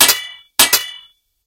Hit on metal
You hit two metal poles together.